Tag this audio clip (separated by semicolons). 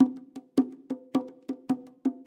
percussion; bongo